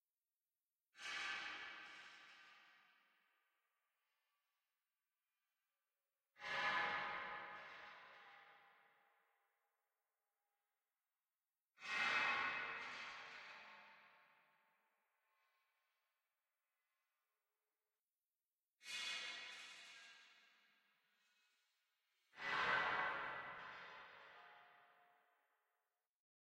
distant falling glass/metal
A set of metallic / glass sounds, created in cubase.
brake, fragment, falling, industrial, shiny, fall, hall, indoor, rod, atmosphere, noise, blacksmith, horror, outdoor, thrill, work, metallic, drone, factory, explosion, ambience, steel, metal, clang, iron, distant, ambient, destruction, squeek, glass